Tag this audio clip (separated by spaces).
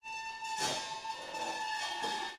ambient msic noise